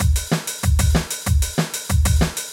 punkrock beat 95 bpm
95, beat, bpm, drumloop, loop, Maschine, rhythm